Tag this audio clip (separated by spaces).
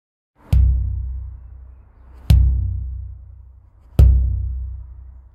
raw-audio field-recording bashing metal bash no-edit hollow hit dark hitting scary foley